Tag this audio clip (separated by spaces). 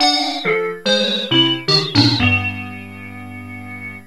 an1-x syntheline